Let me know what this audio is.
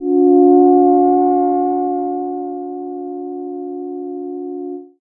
minimoog vibrating F-4
Short vibrating Minimoog pad
pad, slowly-vibrating, minimoog, synth, electronic, moog, short, synthetizer, short-pad, vibrato